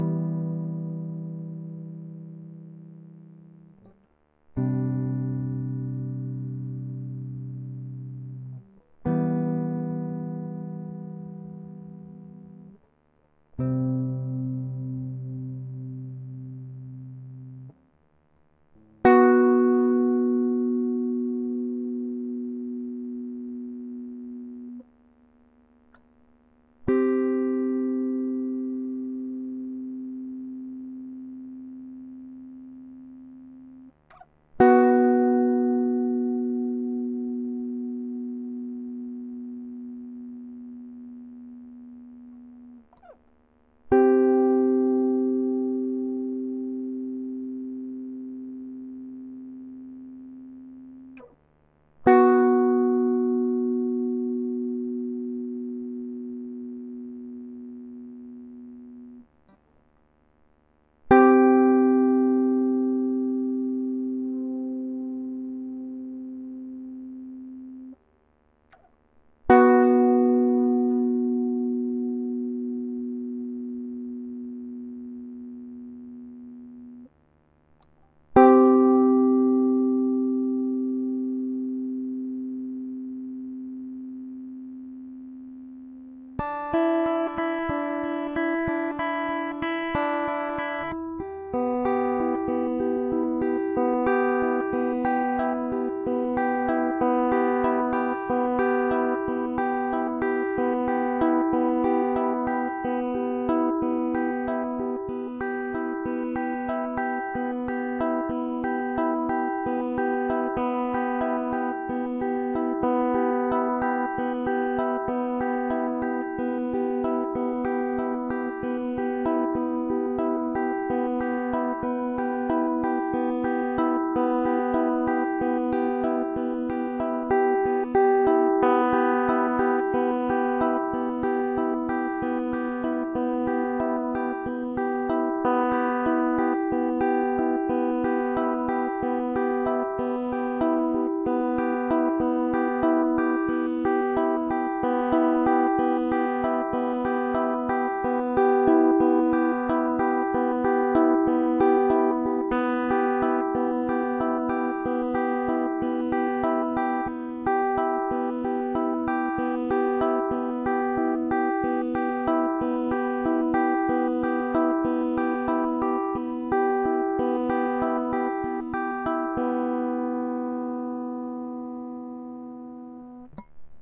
guitar chords for the make noise morphagene